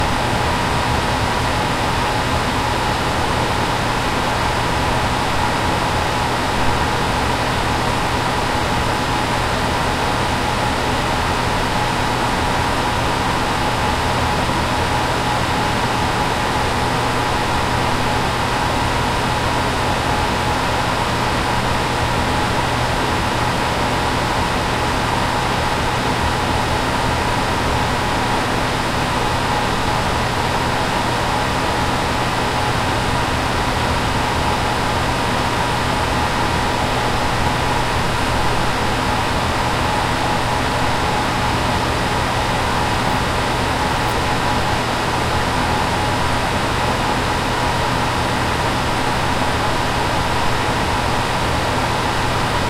A projection booth roomtone recorded with a Tascam DR-40